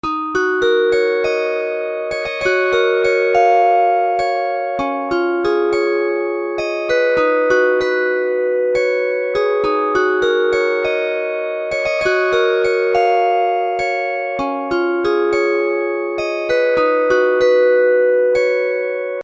Felt Bells Melody
Key of D#m, 100 bpm.